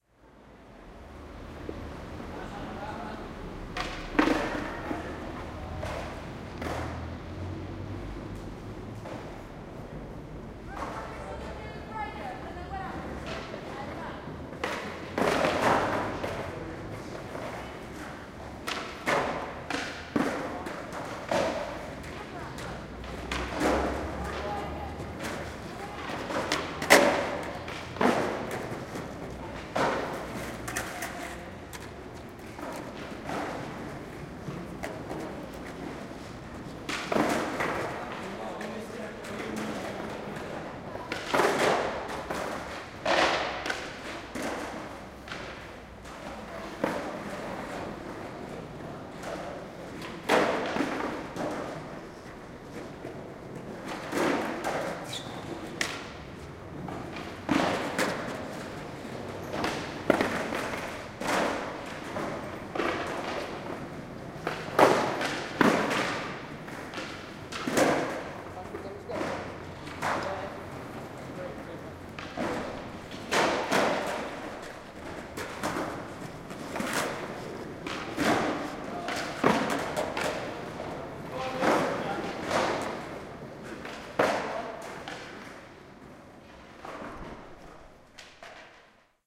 custard-square-with-skateboarders-edited
Stereo recording of about 5 lads skateboarding in a small square (Custard Factory, Birmingham UK). Sound of wheels rumbling and nice meaty thwacks as the lads jump then land. They went around the square and some movement across the stereo image is audible. There is traffic noise from a nearby main road. Some comments and talking between the lads is audible but not clearly articulated. Recorded on a Zoom H2 with the built in front microphones (90 degree nominal separation).
field-recording, skateboarders